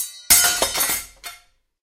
spoon falling onto the working top
cutlery, percussion, fall, spoon, bang, crash